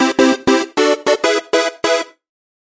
Jingle Win 00
An 8-bit winning jingle sound to be used in old school games. Useful for when finishing levels, big power ups and completing achievements.